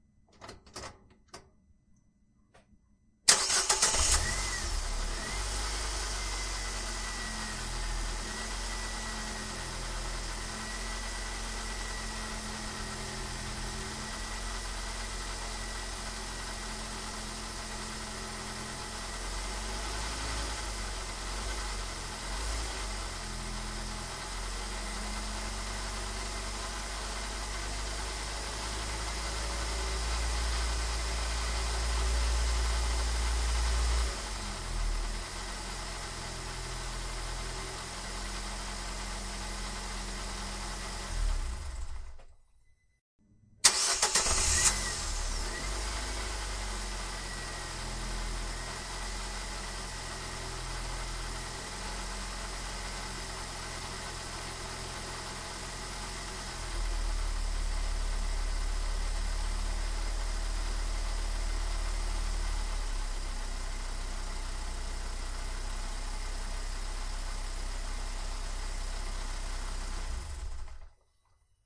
Starting car engine
Starting volkswagen 3-cylinder car engine
auto, ignition, vehicle, car, automobile, starting, start, idle, motor